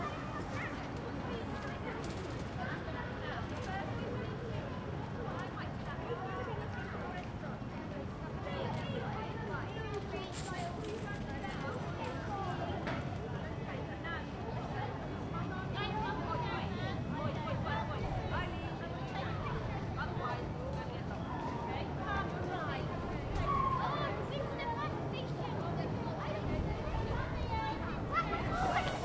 04 Winchester street 3
A trip to the lovely english town of Winchester, on a lovely autumn morning...
Street, Field-Recording, People, Town, Busy, Winchester, Cars, Leisure